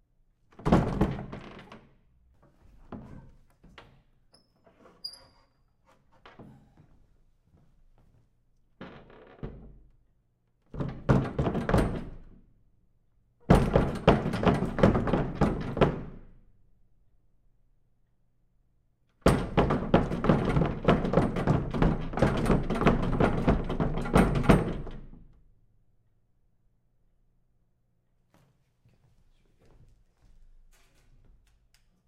Me shaking a large folding table (folded into an upright position). Originally designed to sound like the bookcase hiding the door to the secret annex in a production of The Diary of Anne Frank.